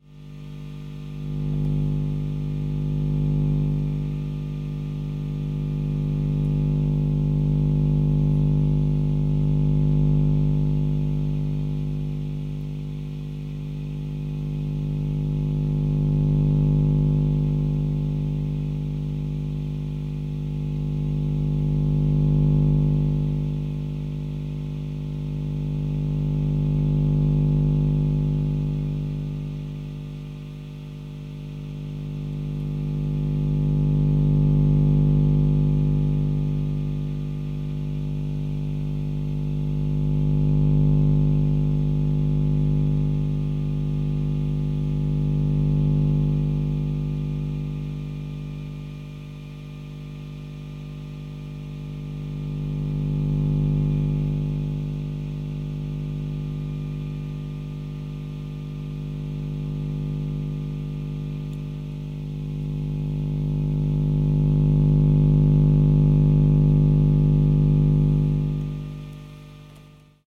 Recorded with Zoom H4. Made with an electric guitar and an amplifier.